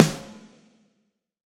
SBUC SNARE 001
Real and sampled snares phase-matched, layered and processed. Contains two famous snare samples. These "SCUB" snares were intended to be multi-purpose samples for use in any genre of music and to be mixed 50/50 with the real snare track using Drumagog / Sound Replacer. Example 1 of 5.
drum, processed, real, sample, snare